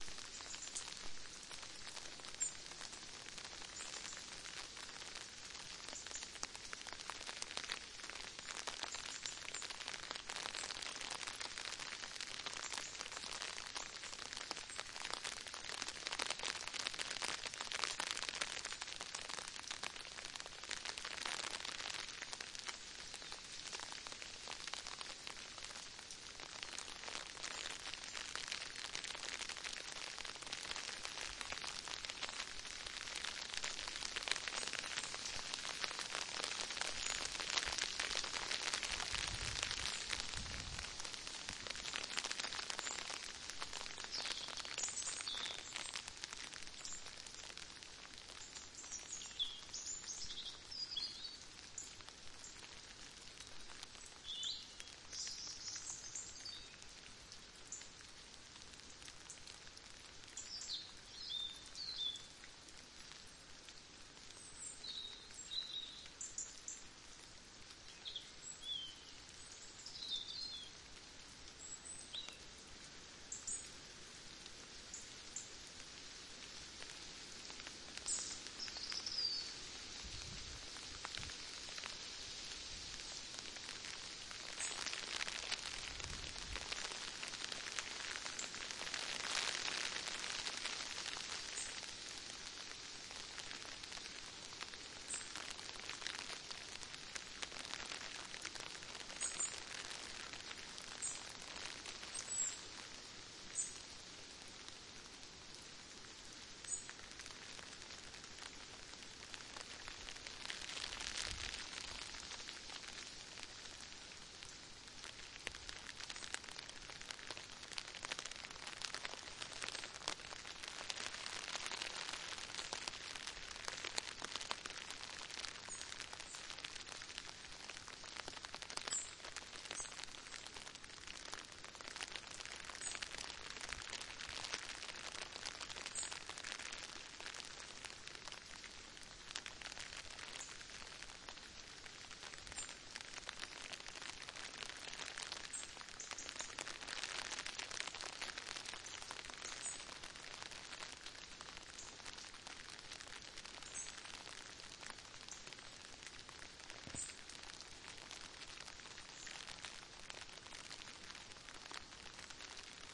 Rain trickling down on an umbrella while birds are twittering in a nearby forest STEREO